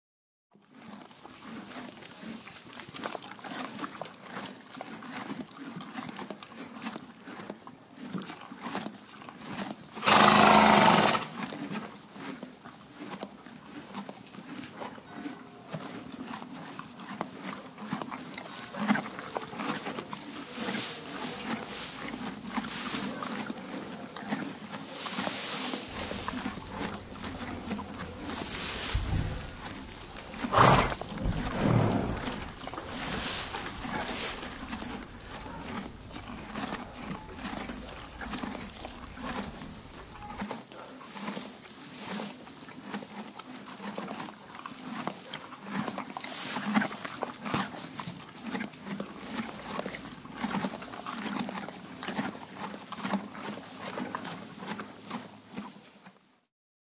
My horse "Bandit Estel" is eating hay